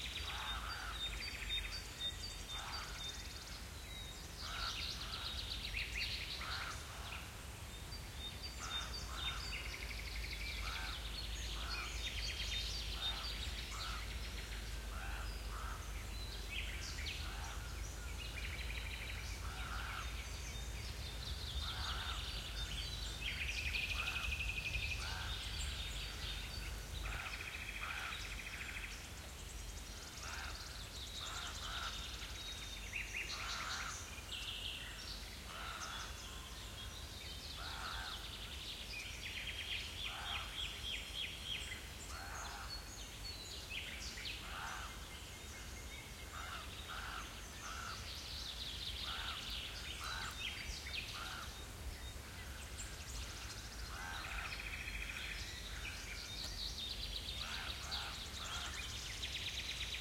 Forest Day Atmos
Forest with birds - Atmosphere
Recorded with:
Rode NTG3
Tascam DR40X
If you use this. Please tag me!